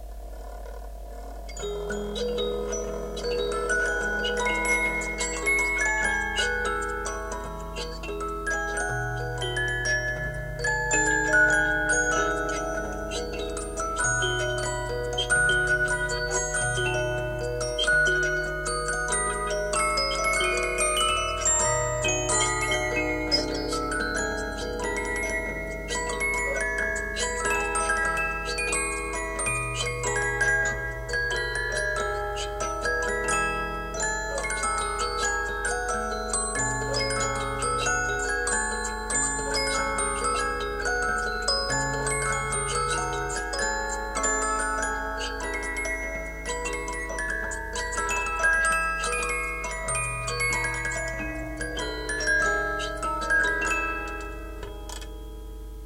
I have refurbished the 150 years old musuc box. Now a little bit richer sound, n'............ c'....... est...... pas,,,,zzzzzzzzzzzzzzz
antique, music-boxes, instruments